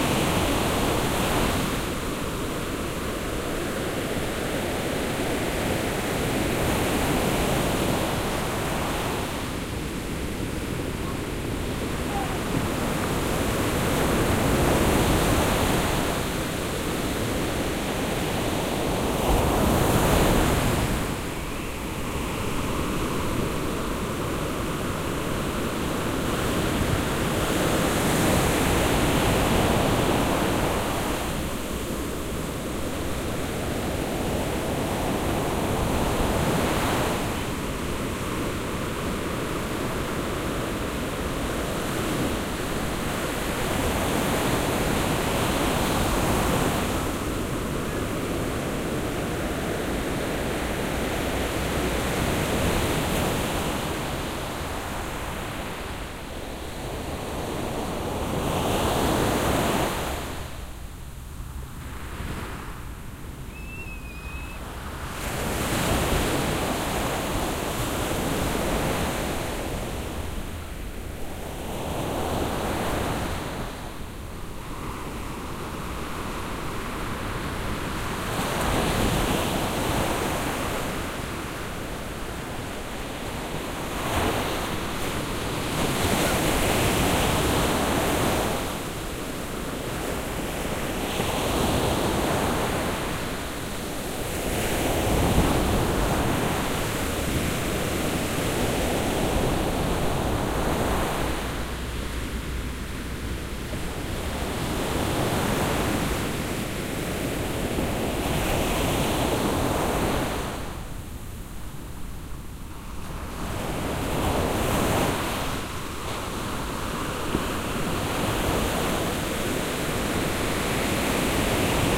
At the Beach of Ubatuba in Brasil.
shore, wave, seaside, ocean, binaural, field-recording, coast, coastline, beach, waves, water, sea